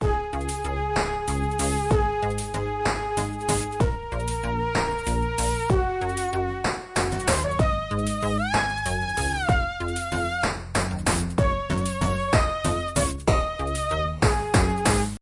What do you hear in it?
A happy synth loop in a 3/4 waltz.